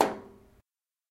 Tuba Percussion - Tom Hi
Substitute high tom sound made by breathing through a tuba. Made as part of the Disquiet Junto 0345, Sample Time.
tuba, high, drums, percussive, percussion, tom